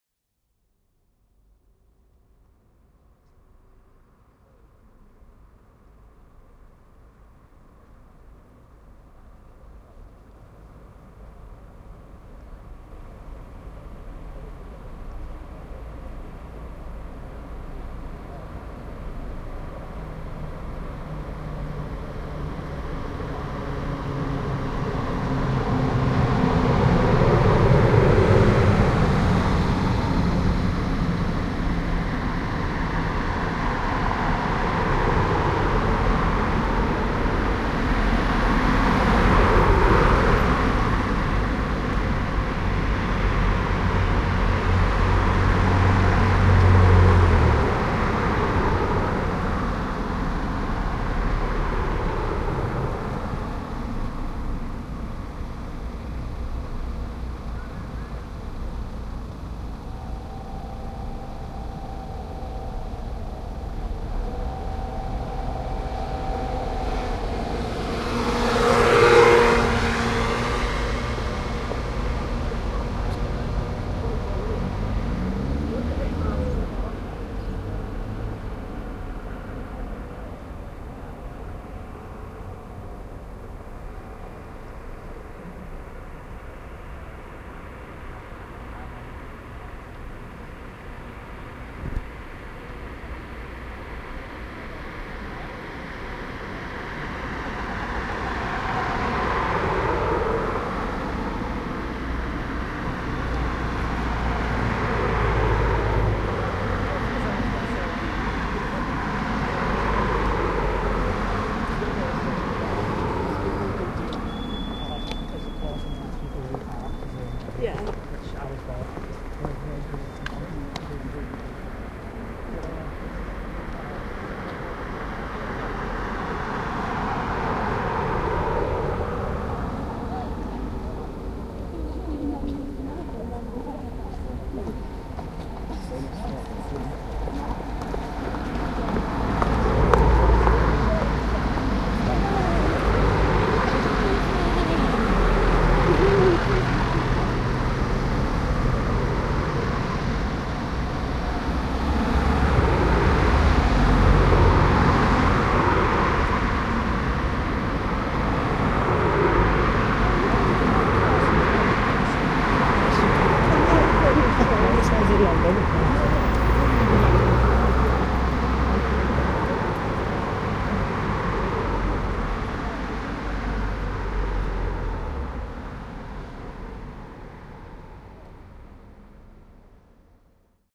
STREET AMBIENCE-YORK 2015
Recorded with a Sharp minidisc recorder and a home-made 3-D imaging microphone, this street recording was made on the road known as Lord Mayor's Walk in York during Fresher's week 2015 outside St John's University. it was evening and the traffic was light.